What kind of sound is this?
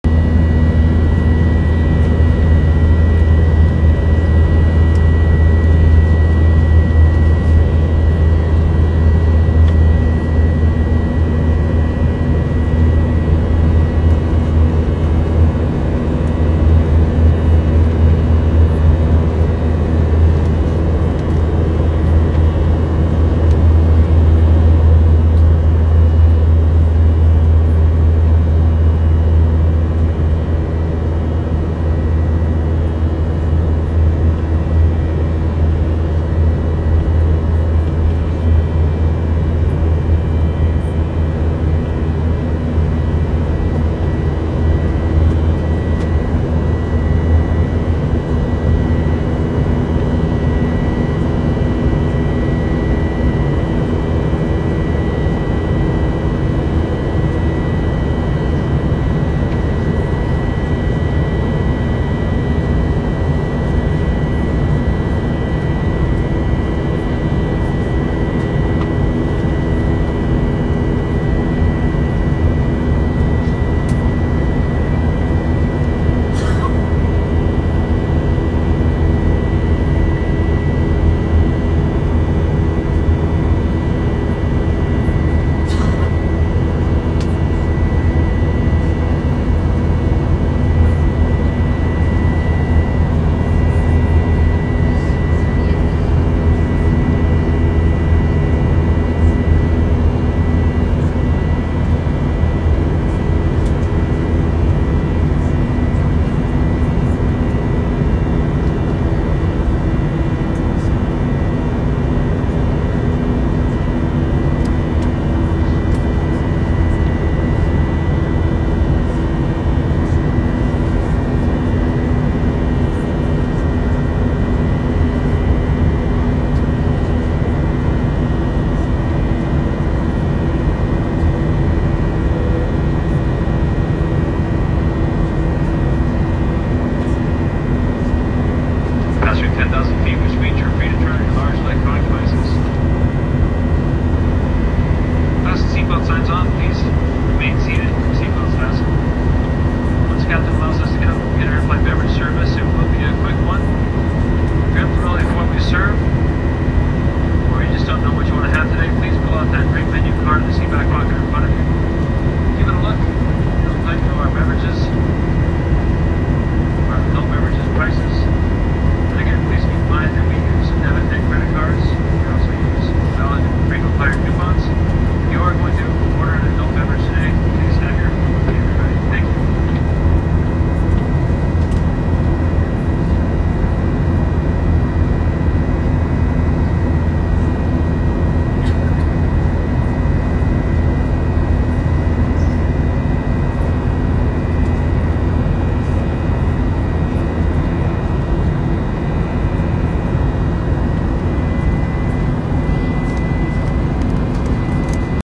Commercial airline flight from Phoenix to Los Angeles. Long recording of interior of main cabin. Engines can be heard loudly. Upon reaching 10,000ft the flight attendant gives the in-flight instructions over the intercom.